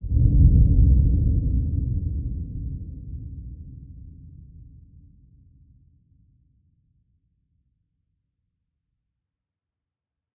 Epic Cinematic Bass Boom. Created from Logic Samples, finished with Echo and Reverb, Sub Bass and some EQ.